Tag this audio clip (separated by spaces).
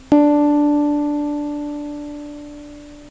Notes Re